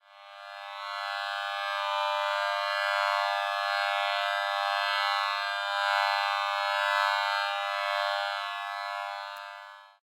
jsyd materialize
Synthesized version of a materialize sound effect used in the 1960s star trek show. I analyzed a recording of the original sound and then synthesized a facsimile using my own JSyd software.
materialize, trek, jsyd, startrek, syd